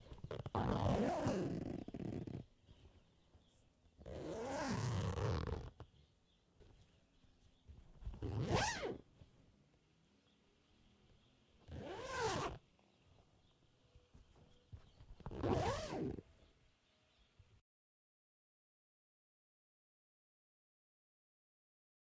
zip on pants